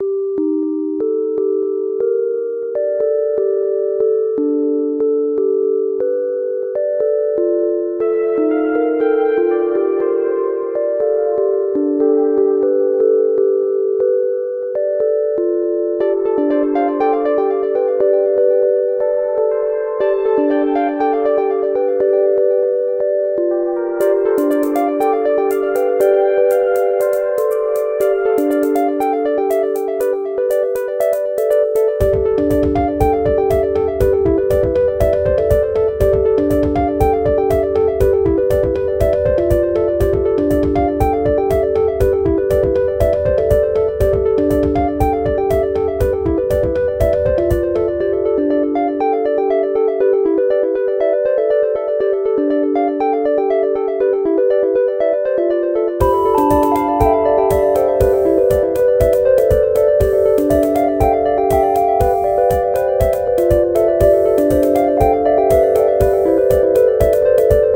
spacesuit tribute
An unfinished electronic musical theme with sci-fi and somewhat funny mood that can be used as a soundtrack or a titles music.